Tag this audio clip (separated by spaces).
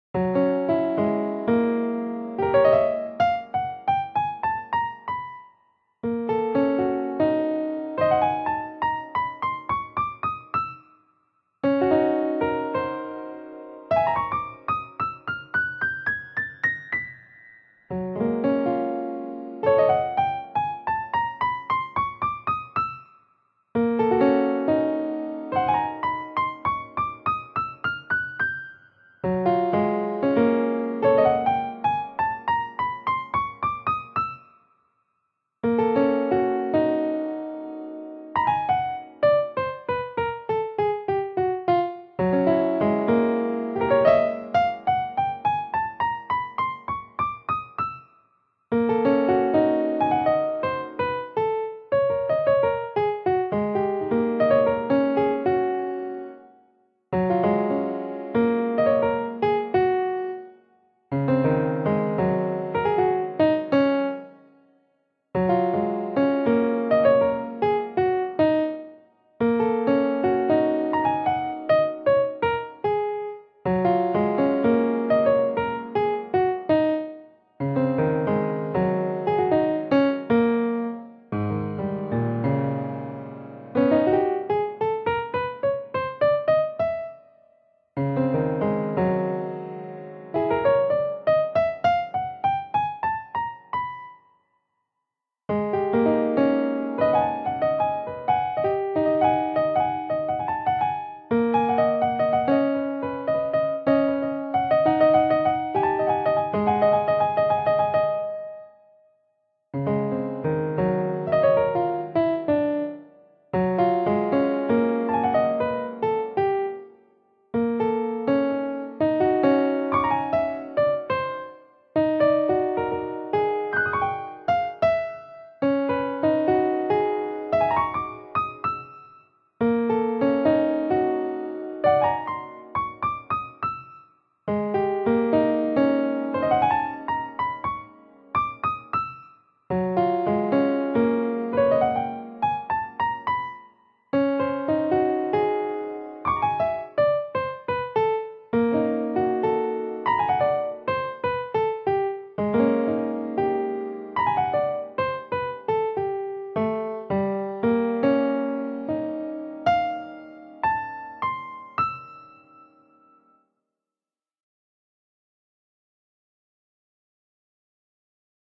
ballad keyboard keys music solo